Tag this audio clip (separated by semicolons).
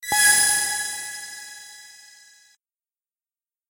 effect; gameaudio; sfx